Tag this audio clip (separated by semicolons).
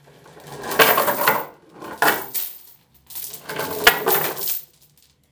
carol; chain; christmas; dragging; dropping; rattling; xmas